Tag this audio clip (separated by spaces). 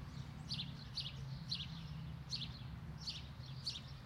bird birds birdsong tweet twitter